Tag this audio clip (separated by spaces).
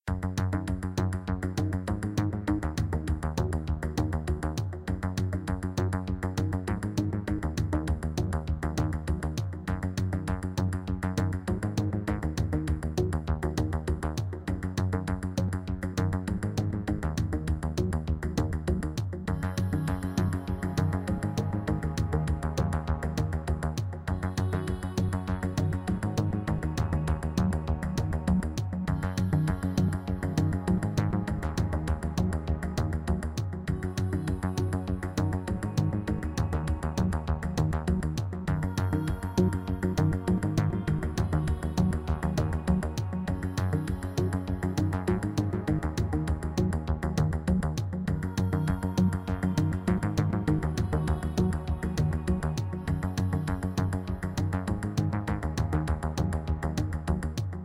planning catchy loop mystery spacey upbeat plan groovy